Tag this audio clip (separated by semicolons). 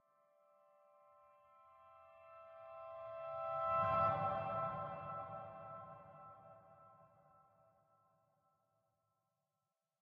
Effects; Processed; Sound-Effect; Chords; Reverb; Atmosphere; Sound-Effects; Ambient; Piano